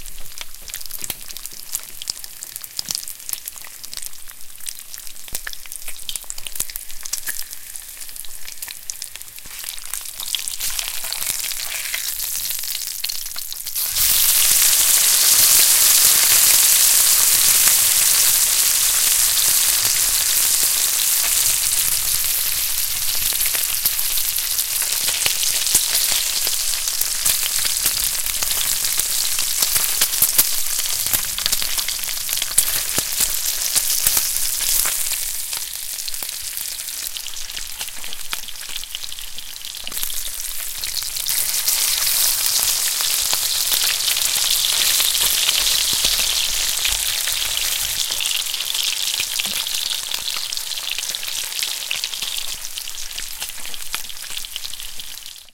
Adding Bacon To Frying Pan
Flipping bacon in a hot skillet
Recorded with my Tascam DR-07 MKII
Greasy, Frying, bacon, Pork, Hot, Oil, Bubble, skillet, splash, stove, Ham, meat, Pan, sizzling, Sizzle, Cook, Cooking